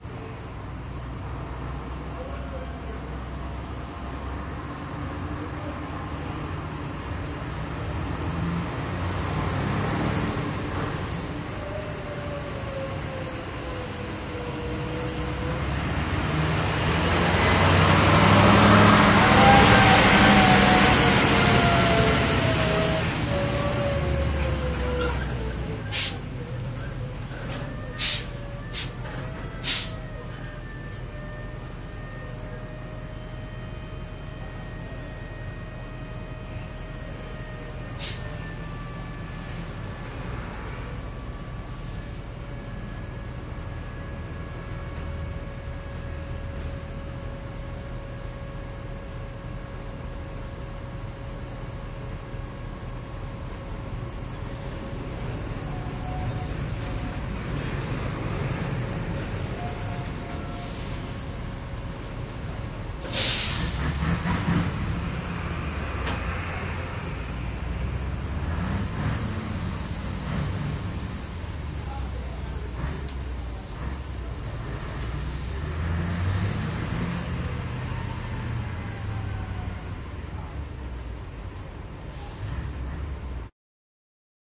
A truck pulls out of a truck stop at a steel mill, after leaving the weighbridge. Sounds include the engine and the traler as it passes from right to left.
Sound Professionals SP-TFb2s into Zoom H4N Pro.